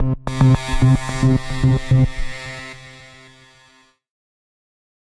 Alien Alarm: 110 BPM C2 note, strange sounding alarm. Absynth 5 sampled into Ableton, compression using PSP Compressor2 and PSP Warmer. Random presets, and very little other effects used, mostly so this sample can be re-sampled. Crazy sounds.
110, acid, atmospheric, bounce, bpm, club, dance, dark, effect, electro, electronic, glitch, glitch-hop, hardcore, house, noise, pad, porn-core, processed, rave, resonance, sci-fi, sound, synth, synthesizer, techno, trance